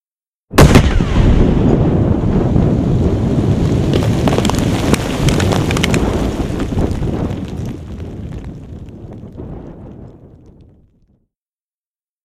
Windy Explosion
A froced ammunition explosion.
wind, ka-pow, bad-camera, windy, death, dead, kapow, bouf, termination, devestation, destruction, ka-boom, terminator, bang, annihilator, explosion, annihilation, bumm, boom, kaboom, pow, devestating